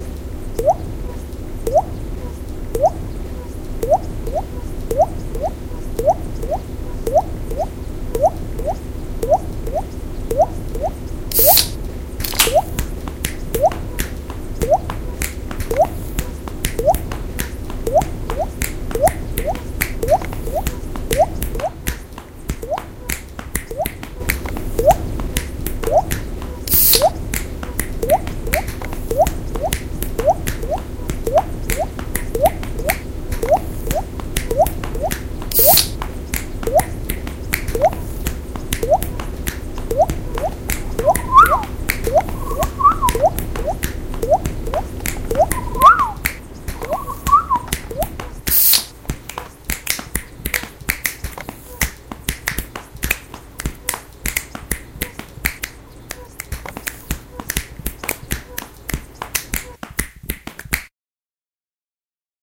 SonicPostcard WB Felix
Here's the SonicPostcard from Felix, all sounds recorded and composition made by Felix from Wispelbergschool Ghent Belgium
belgium, cityrings, ghetn, sonicpostcards, wispelberg